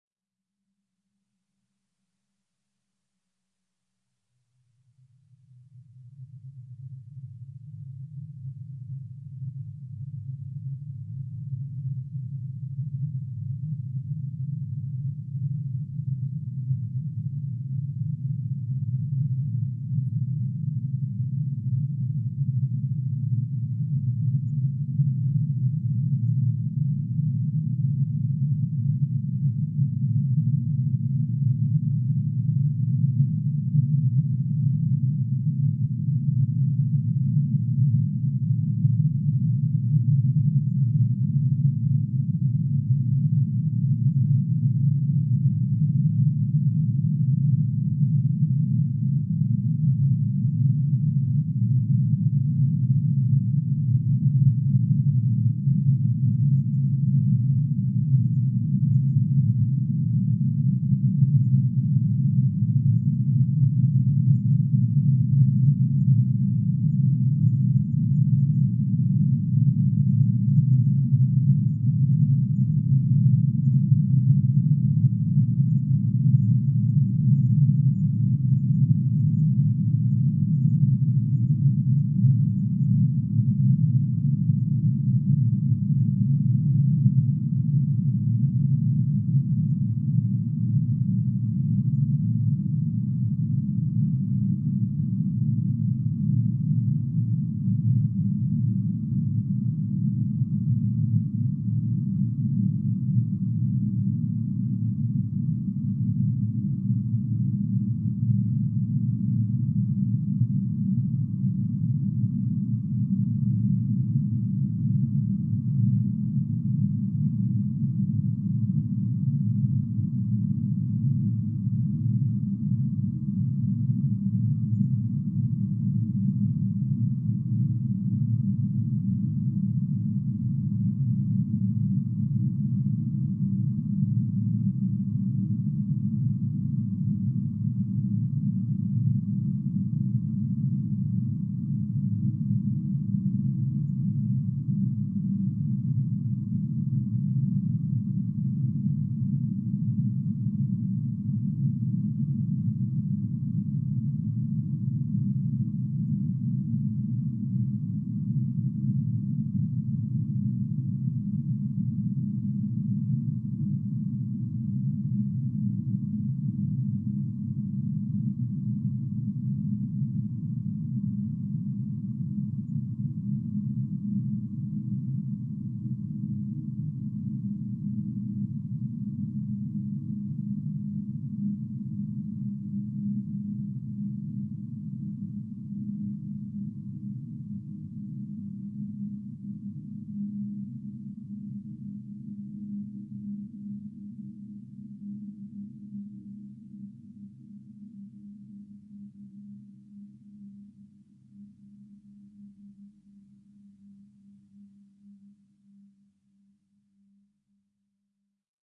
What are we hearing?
LAYERS 017 - MOTORCYCLE DOOM-61
LAYERS 017 - MOTORCYCLE DOOM is a multisample package, this time not containing every single sound of the keyboard, but only the C-keys and the highest one. I only added those sounds because there is very little variation between the sounds if I would upload every key. The process of creating this sound was quite complicated. I tool 3 self made motorcycle recordings (one of 60 seconds, one of 30 seconds and the final one of 26 seconds), spread them across every possible key within NI Kontakt 4 using Tone Machine 2 with a different speed settings: the 1 minute recording got a 50% speed setting, while the other 2 received a 25% setting. I mixed the 3 layers with equal volume and then added 3 convolution reverbs in sequence, each time with the original motorcycle recordings as convolution source. The result is a low frequency drone like sound which builds up slowly and fades away in a subtle slow way. I used this multisample as base for LAYERS 017 - MOTORCYCLE DOOM 2
menacing, drone